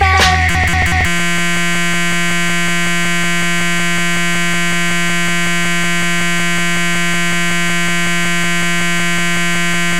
Digital error
Digital clock error through S/PDIF port of M-Audio 1814 sound card. Very common.
Fail, Bug, Error, SPDIF, Digital